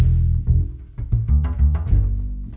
Double bass groove.1
Double-bass acoustic percussive groove funky Emin 93-bpm 4/4 home recorded on Ableton.